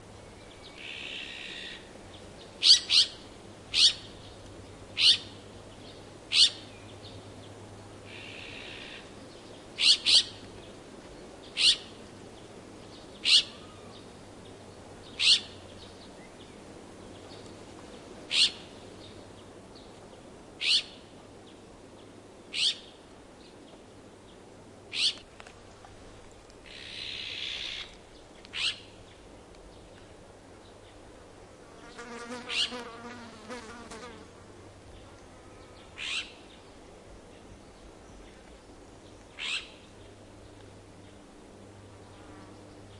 20060524.azure-winged.magpie.close
calls of a single azure-winged magpie, as heard at 5 m / 'canto' de un rabilargo a unos 5 metros
birds, donana, field-recording, magpie, nature